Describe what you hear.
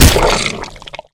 An incredibly sickening sound effect made from yet again dropping meat and squeezing a goat's heart. The soft ventricles of the heart produced a rather unpleasant (or rather sadistic) sound that is sloppy, wet and guttural.
It was then promptly sliced into strips, marinated with garlic/ginger paste and then barbequed and finally it was devoured with great impetus. It tastes honestly like a much more veiny, husky version of a good cut of beef. A good meal despite being offal.